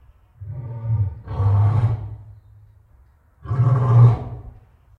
Lion growls taken from:
I've cut most of the original, rearranged the remainder and gave it more depth/power.
If you use it, leave a link in the comments so I can hear it in action.
Enjoy!
lion, growling